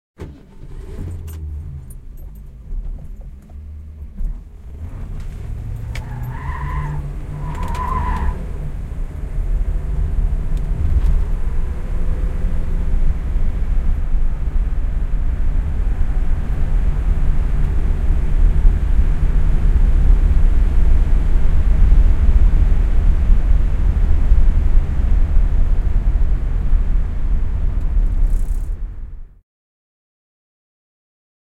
Ford Mustan pulling away on asphalt, tyres screeching // Ford Mustang, lähtö asfaltilla, renkaat ulvovat
Starting motor, pulling away on asphalt tyres screeching, some driving, fade out.
Recorded inside of a car.
Käynnistys autossa, lähtö renkaat ulvoen asfaltilla, ajoa mukana vähän matkaa, häivytys. Nauhoitettu auton sisältä.
Paikka/Place: Suomi / Finland / Nummela.
Aika/Date: 1990.